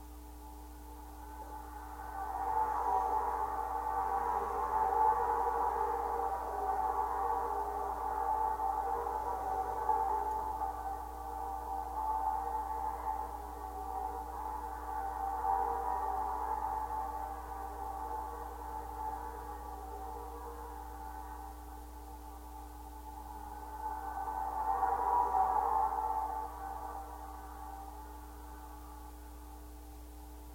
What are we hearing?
Contact mic recording of a low-voltage mains power cover on Lafayette Street in Santa Clara, California, in the Agnews district by the old sanitarium. Recorded July 29, 2012 using a Sony PCM-D50 recorder with a wired Schertler DYN-E-SET contact mic. Traffic noise, resonance, 60 Hz hum.